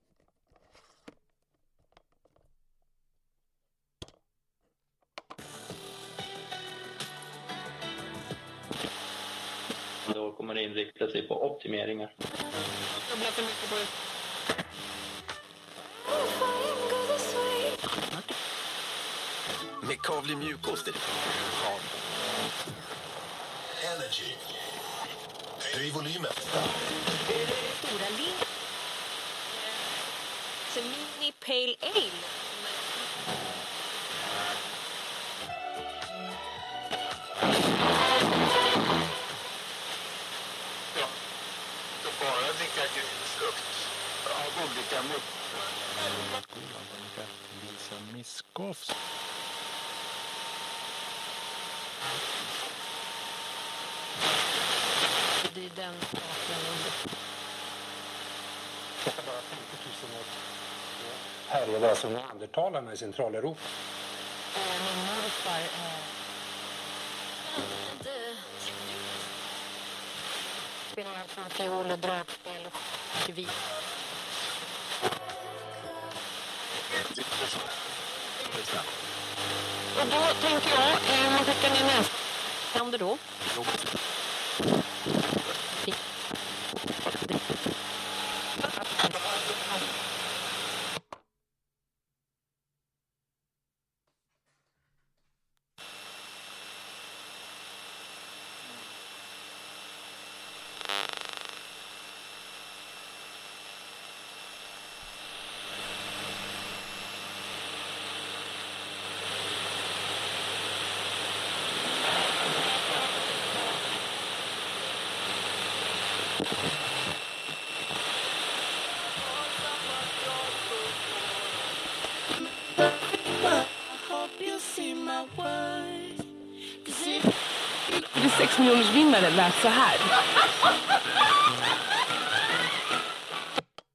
A clip of me turning on my radio and scrubbing through the frequencies. Includes button presses, turning the radio on and off, and setting up the tuner.